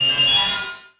raspy metallic grinding sound synthesized thru orangator.